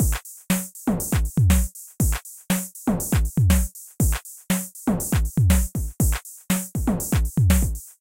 distorted drum loop made using 909 samples including handclap and tom in Linplug RMIV. distorted whilst lying on a bed in a hotel in France. romance in a rhythm. feel my heart beating 2004
loops, 120, breakbeats, drum-loops, drums, bpm